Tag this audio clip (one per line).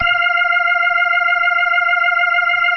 organ
rock
sample
sound